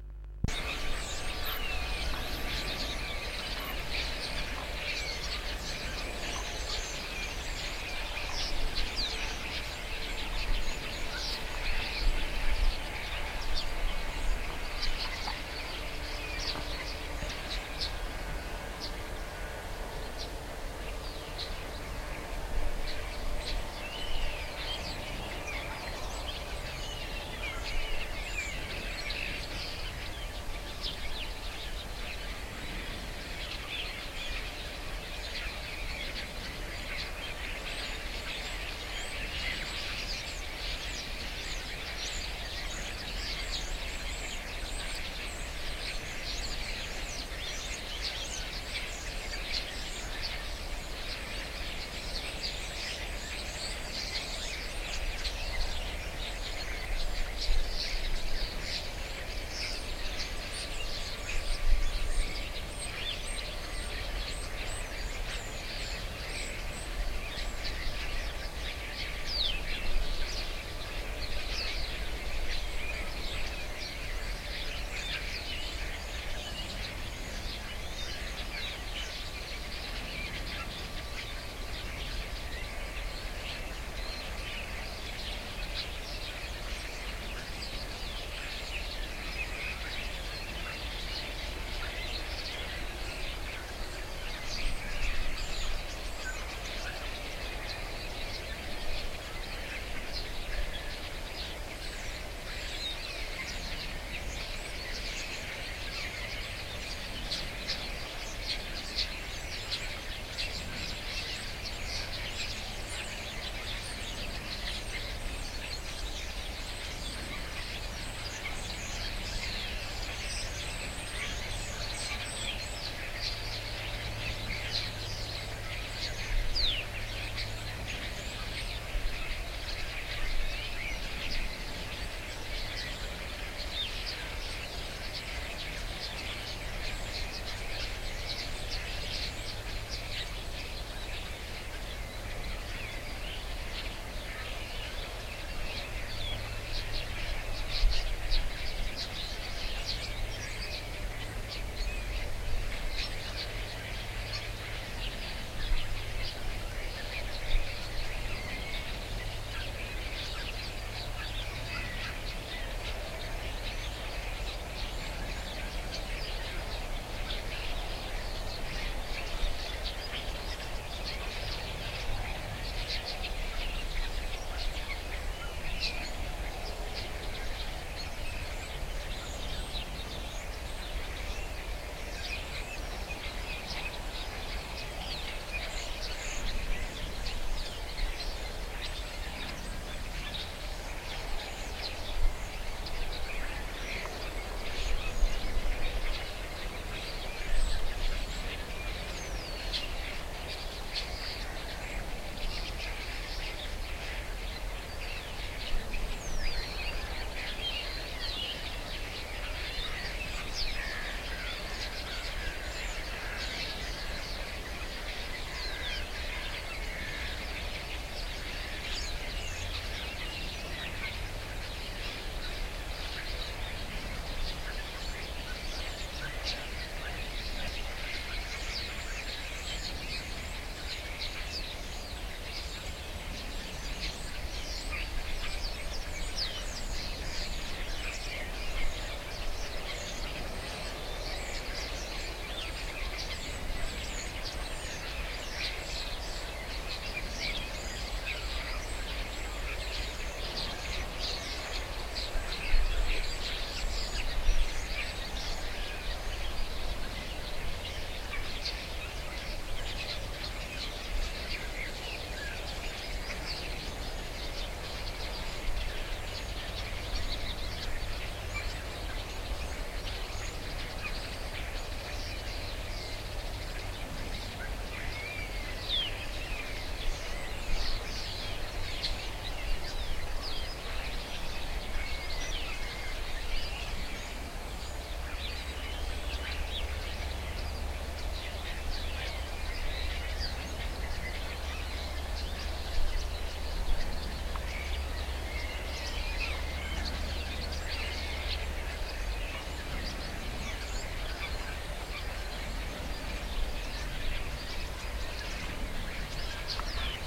Lots of skylarks
EDIT: Starlings, not skylarks!!
The sound of a lot of starlings, sitting in trees just about 150 m away from where i was standing. In the background the farmers are busy in the fields harvesting, so you might hear some noise from tractors. This was recorded from my garden, with a Sony minidisc MZ-R30 with binaural in-ear microphones.